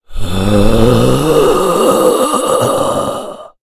A low pitched guttural voice sound to be used in horror games, and of course zombie shooters. Useful for a making the army of the undead really scary.
Evil, Speak, games, Growl, gamedeveloping, Talk, videogames, game, Voice, Undead